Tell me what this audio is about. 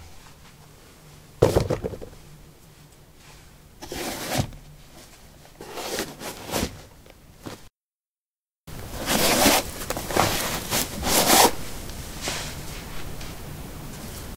soil 15d darkshoes onoff
Taking dark shoes on/off on soil. Recorded with a ZOOM H2 in a basement of a house: a wooden container placed on a carpet filled with soil. Normalized with Audacity.
footstep, footsteps, step, steps